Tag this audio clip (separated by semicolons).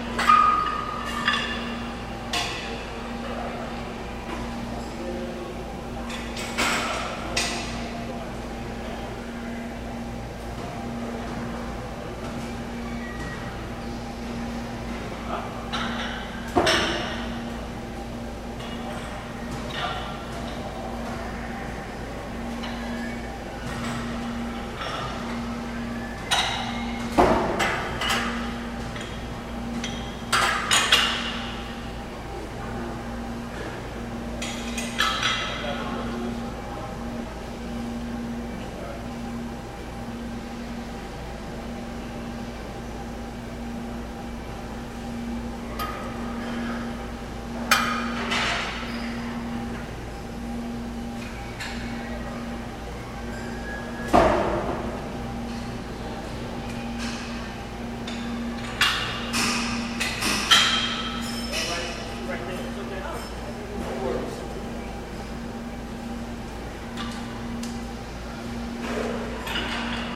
gym; lift; sony-ic-recorder; strong